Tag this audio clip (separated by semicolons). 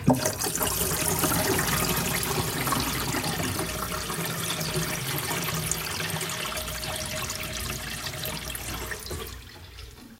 water; bathroom; faucet; running; sink; drain